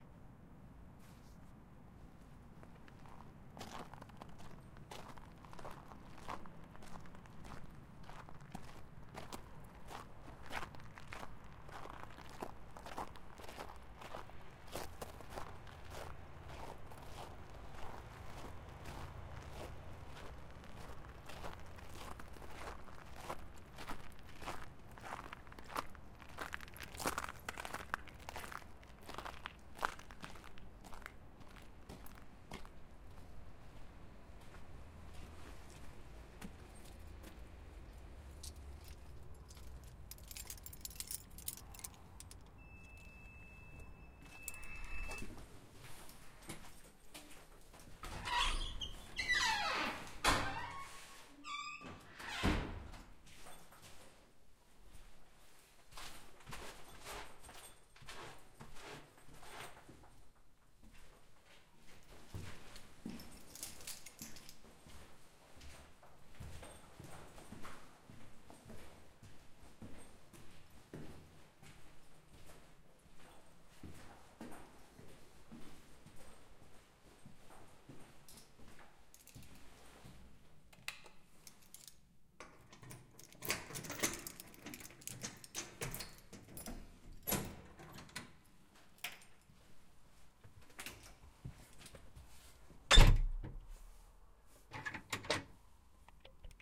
A person approaches the entrance, opens the door with an electronic lock, goes up the stairs, opens the door to the apartment, enters and writes down the lock.
Recorder: Tascam DR-40.
Recorded at 2016-03-24.
door, entrance, footsteps, ground, lock, stair, steps, walking